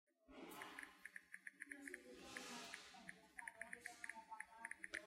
smartphone typing
The sound that comes when you're typing in a smartphone. The sound has been cleaned by compressing it, so this way is cleaner.
device, typing